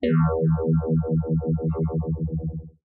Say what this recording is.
guitar kalampaka arxikos tmima- 1 flt
metasynth, micro-tuning, guitar, yamaha